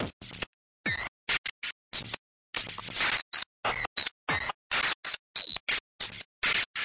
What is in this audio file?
A few sample cuts from my song The Man (totally processed)
breakcore, freaky, glitch, glitchbreak, techno